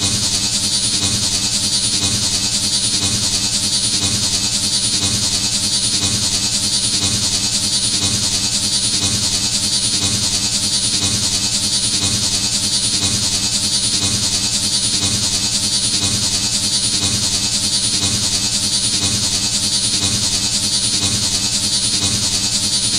Sci Fi Machine 1
AUDACITY (sound is stereo)
- Cut 29.0s to 30.0s
- Effect→Tremelo…
Waveform type: sine
Starting phase: 0
Wet level: 50
Frequency: 10 Hz
- Effect→Echo..
Delay time: 0.01
Decay factor: 0.6
- Effect→Repeat...
Number of repeats add: 22